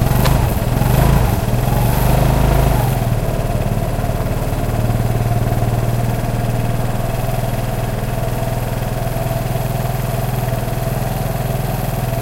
vespa scooter motor
motor of a vespa scooter
field-recording, machines